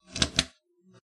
radio pull out
recorded sound of the AFC changing switch, the same old solid state radio. ITT
AM, ambient, effect, radio, radio-noise, switch